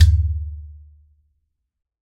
This pack samples a Middle-Eastern hand drum called an Udu. To achieve a stereo effect, two drums of different pitches were assigned to the left and right channels. Recorded articulations include a low open tone, a high open tone, a strike on the drum's shell, and a pitch bend. You can also find seperately a basic rhythm loop if you browse my other uploaded files. Feedback is welcome and appreciated. Enjoy!
drum, middle-east, percussion, hand, acoustic